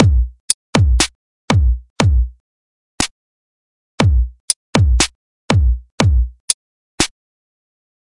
beat 120bpm-03
loop, quantized, drums, rhythmic, rhythm, 120bpm, hip, beat, hop